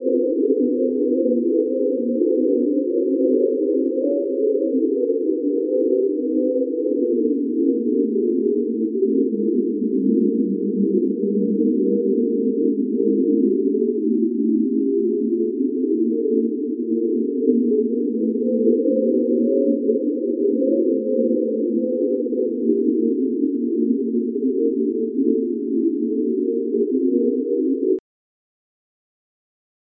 Coagula drone 1 med
A medium pitched drone made with Coagula Light.